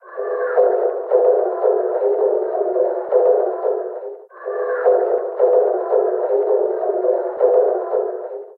Looped Airport Noise 3
Another tribal-ish loop.
beat, loop